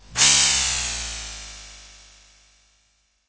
Artificial Simulated Space Sound 14
Artificial Simulated Space Sound
Created with Audacity by processing natural ambient sound recordings
UFO, alien, ambient, artificial, atmosphere, drone, effect, experimental, fx, pad, sci-fi, scifi, soundscape, space, spacecraft, spaceship